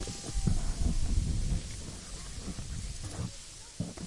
So de les plantes en moviment
Is the sound of the leaves of a plant on our side. Recorded with a Zoom H1 recorder.
Deltasona, Llobregat, nature, plant, wind